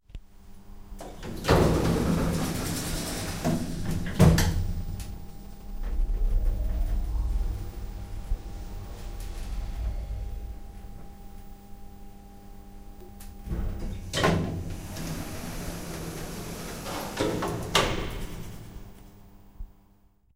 Sound inside elevator
Recorded with Zoom H1n